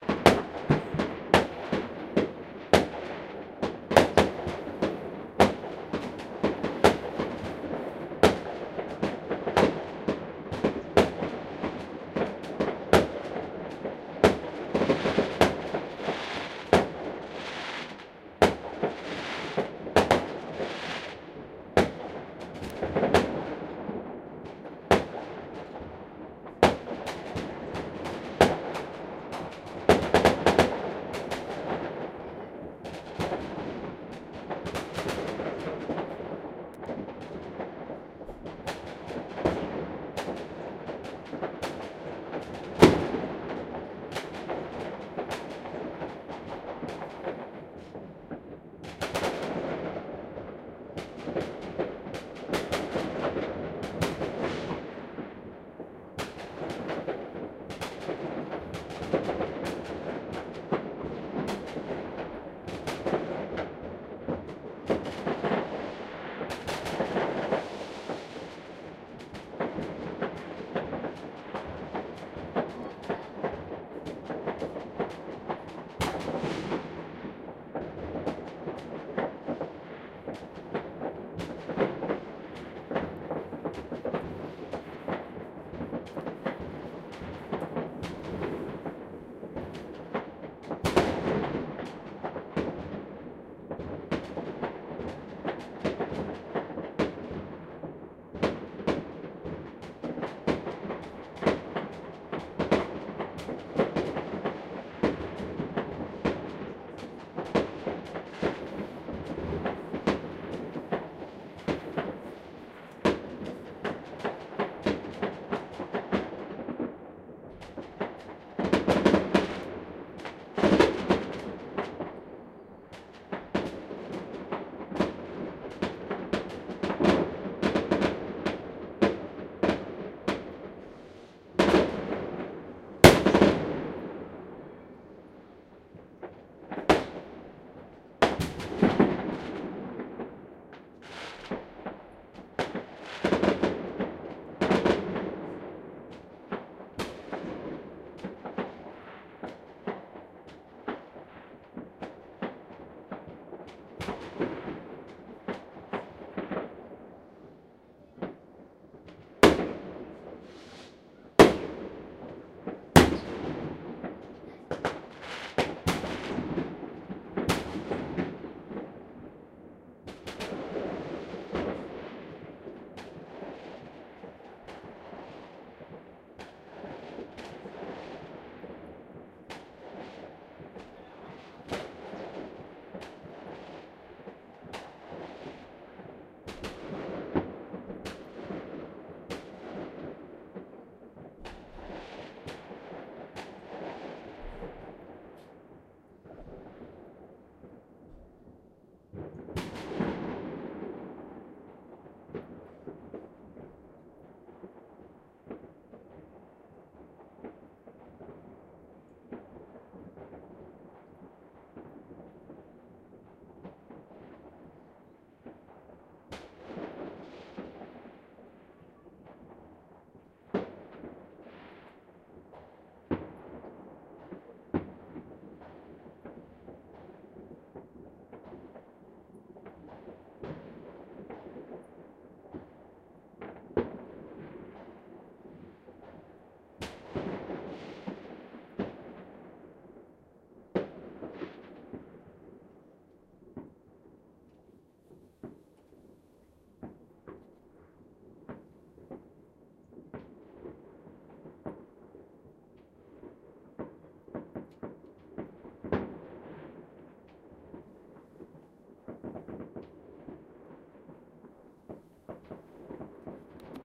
New year celebrations fireworks
NEw year celebrations recording fireworks
explosions, celebration, year, war, people, distance